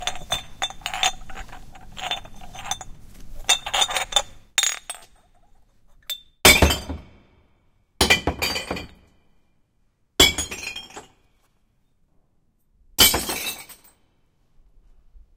Throwing away glass jars into a container for glass trash.
Recorded with Zoom H2. Edited with Audacity.
trash, junk, throwing-away, glass, recycling